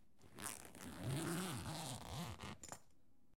Unzipping Bag
Unzipping a duffel bag.
bag, unzip, unzipping